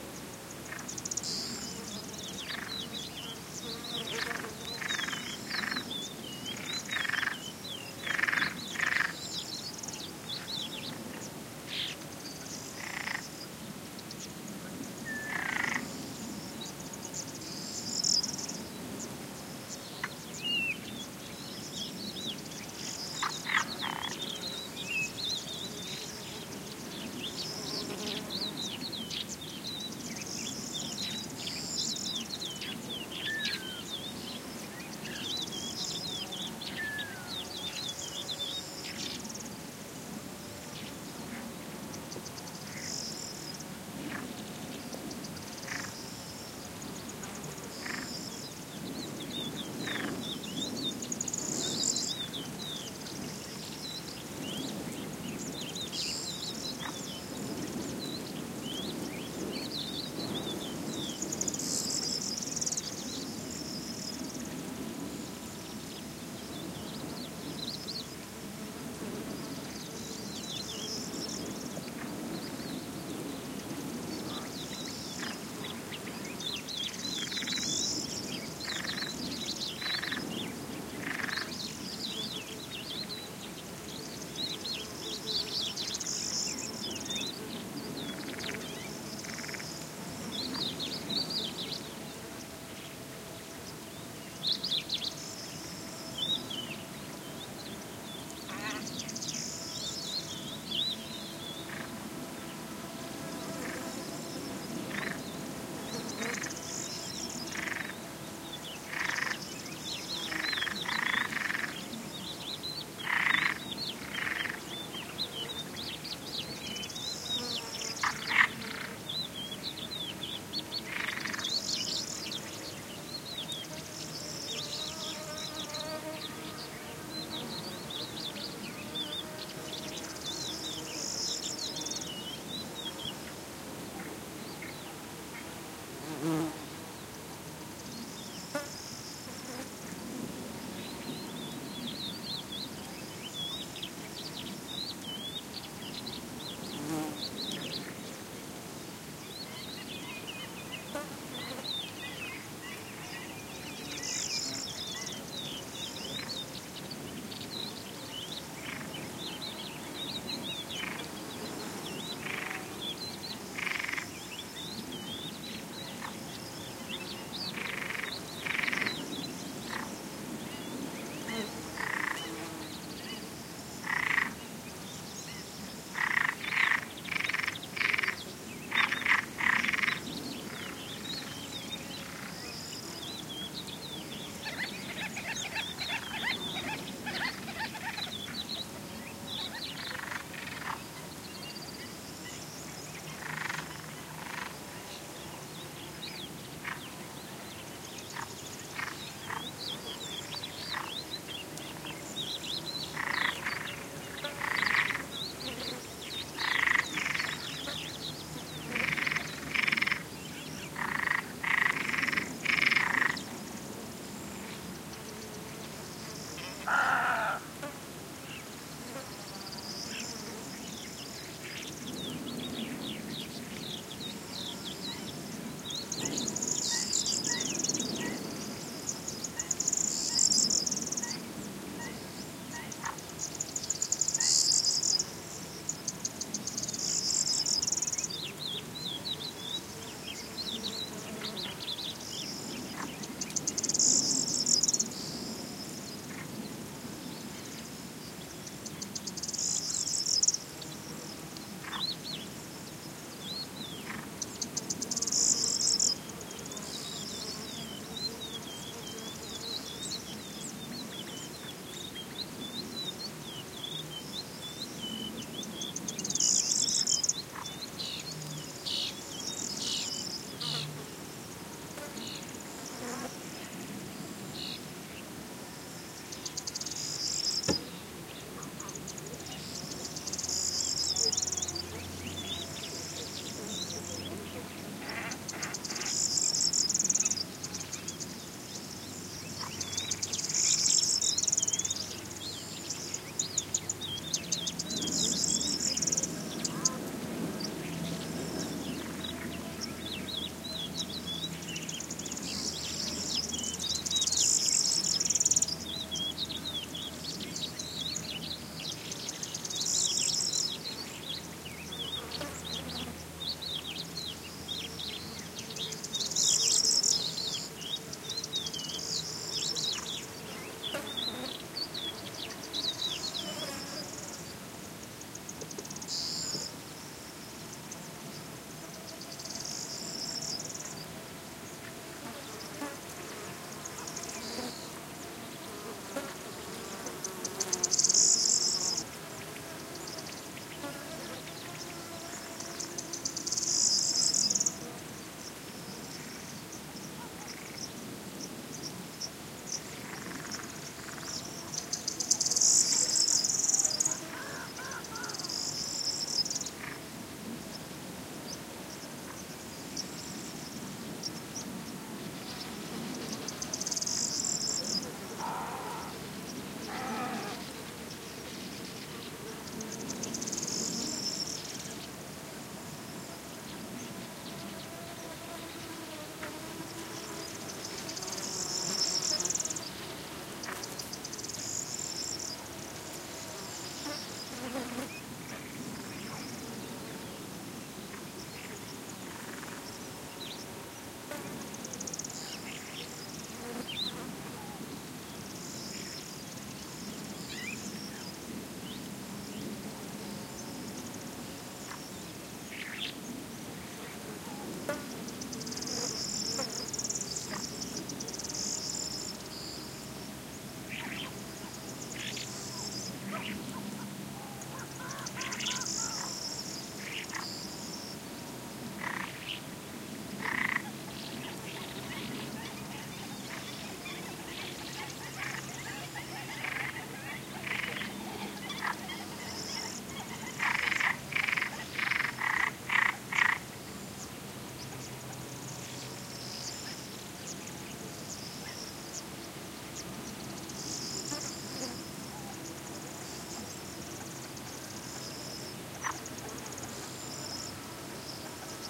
20110320 spring.marsh.atmosphere.01
very close early spring ambiance with insects, frog and varied bird calls. Some wind noise noise. Recorded at the Donana marshes, S Spain. Shure WL183, Fel BMA2 preamp, PCM M10 recorder